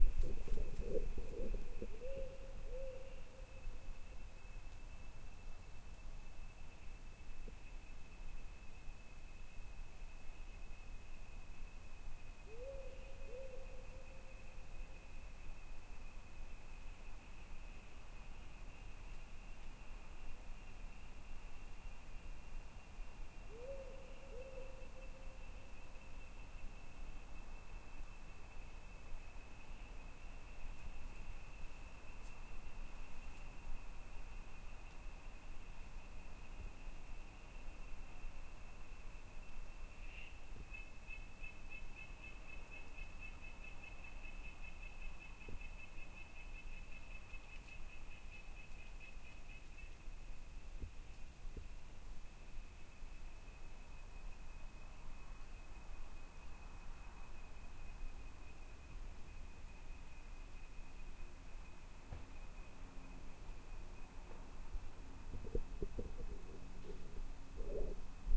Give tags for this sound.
forest; field-recording; owl; atmos; night

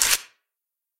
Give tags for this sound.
paper-jump; video-game

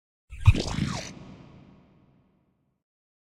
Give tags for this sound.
beam; gun; plasma; sound-effect

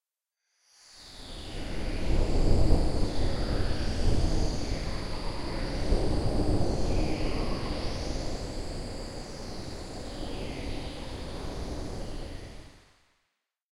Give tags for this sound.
thunder; storm; forest; nature; ambience

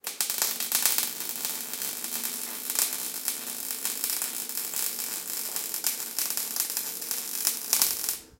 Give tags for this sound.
crackling; discharges; electricity; fasteners; flash; lightning; noise; sparks; welding

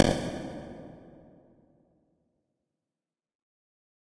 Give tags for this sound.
hand-drawn; impulse; response; sample

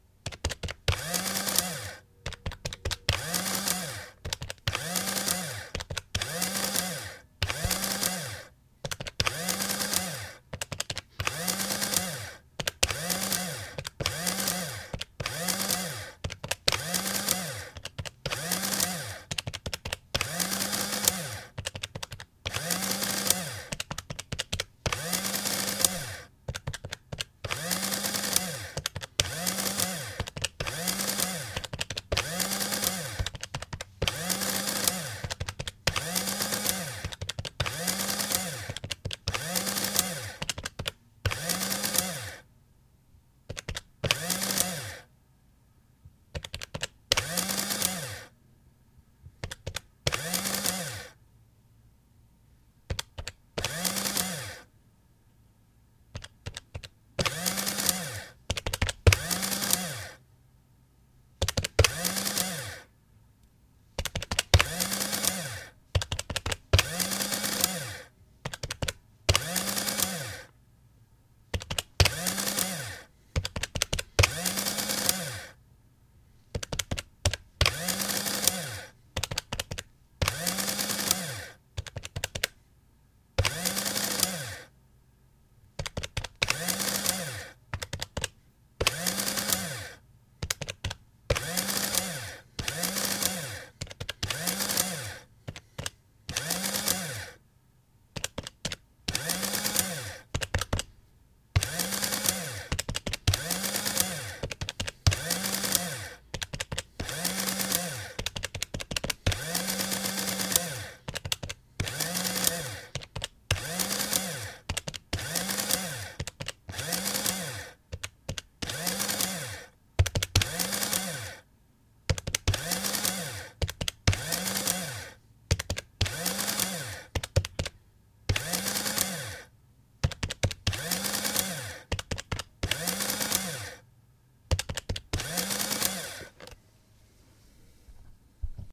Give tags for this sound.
adding-machine; buttons; continuum-4; motor; sound-museum

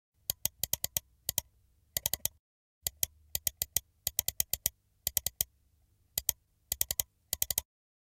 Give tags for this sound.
clicking; desktop; sfx; mouse

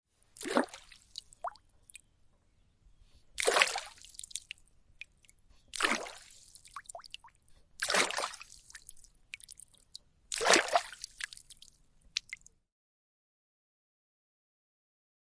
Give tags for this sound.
babbling; drip; dripping; drop; lake; liquid; splash; trickle; water

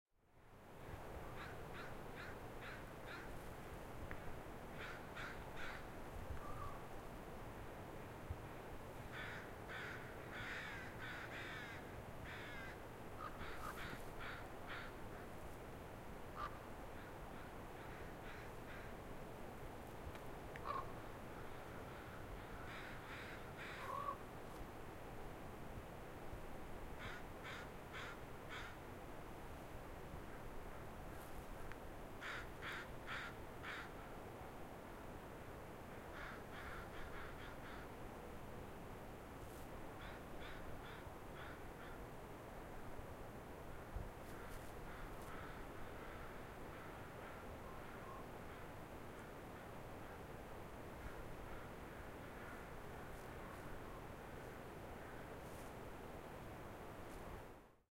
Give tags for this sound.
crows; morning; field-recording; river

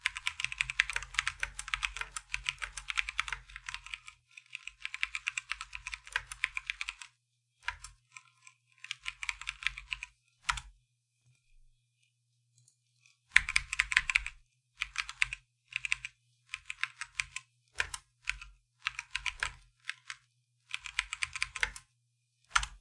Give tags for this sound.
computer; typing

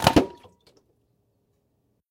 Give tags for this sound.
rim; snare; drums

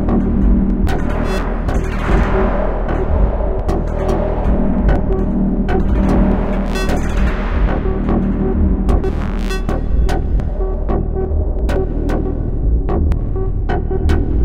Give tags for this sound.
Cloudlab-200t-V1; Reaktor-6; 2; Buchla; Buchla-200-and-200e-modular-system

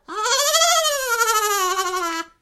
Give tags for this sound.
fx; monster; voice

